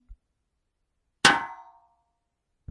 Metal Hit 6
A bunch of different metal sounds. Hits etc.
Industrial, Steampunk, Metal, MetalHit, Machinery, Weapon, Sword